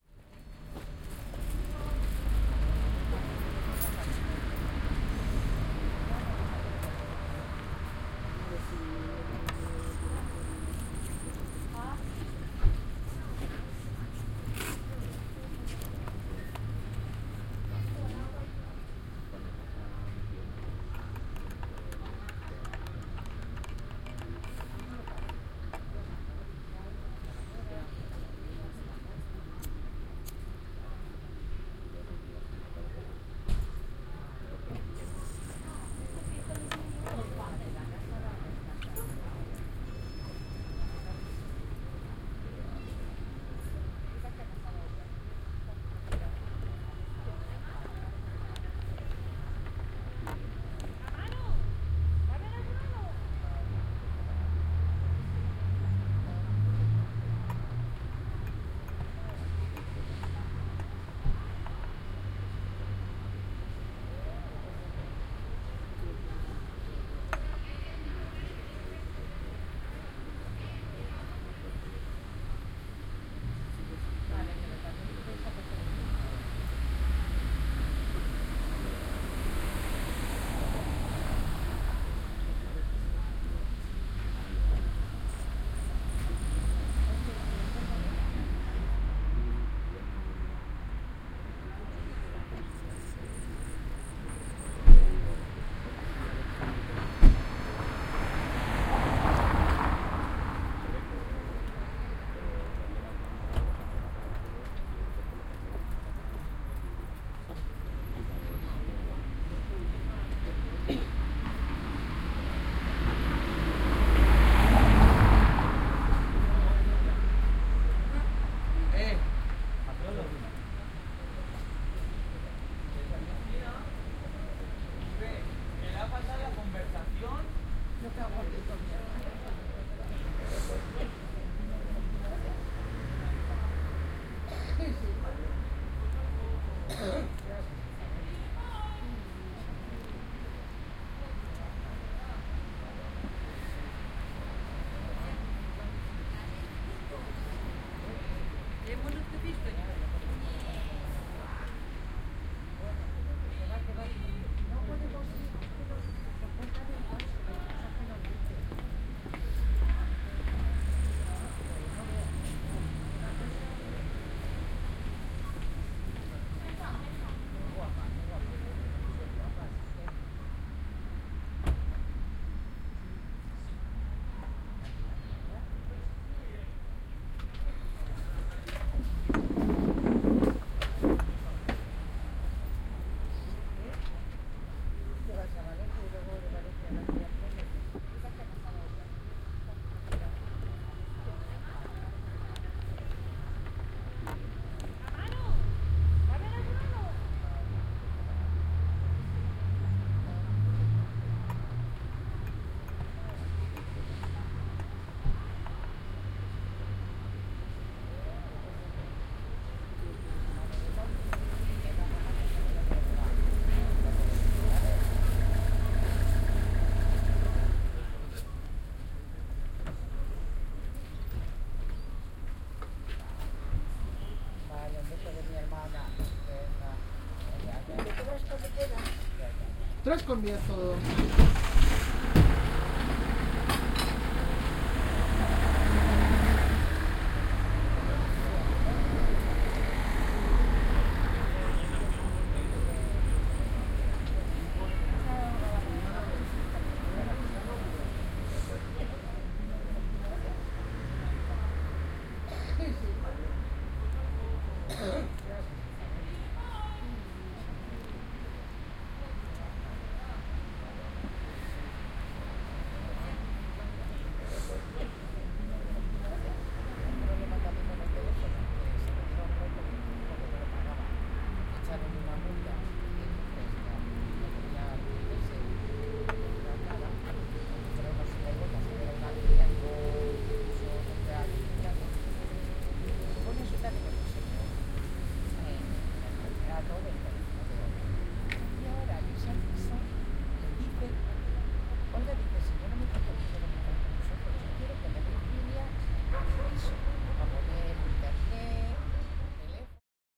Cafeteria exterior (next to road and supermarket)
Calm cafetria placed in the exterior of the university. Tipical sounds of a caferteria like cups, tables or chairs can be apreciated, also some dialogs in Castillian.
Next to road and supermarket.
Recorded with headword binaural microphones Soundman OKM